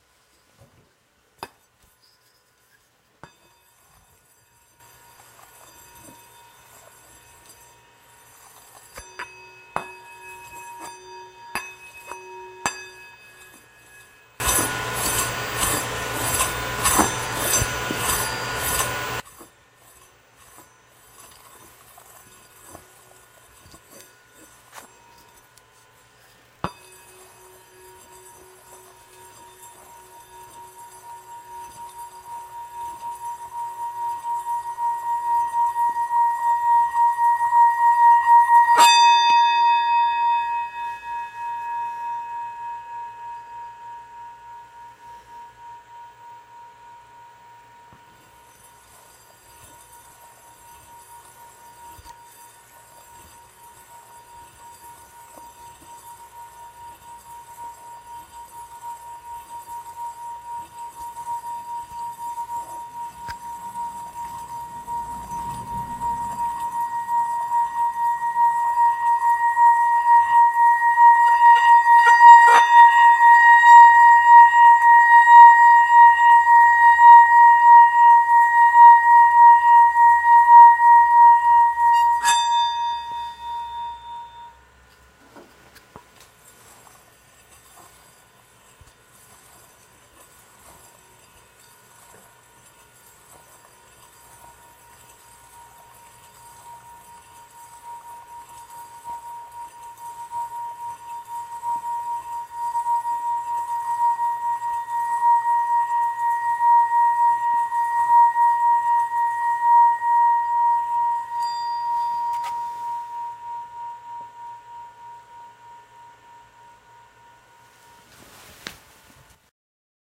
This is a recording of a Tibetian singing bowl being rubbed with a wooden rod until it starts ringing.